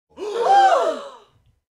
breath group shocked2
a group of people breathing in rapidly, shock-reaction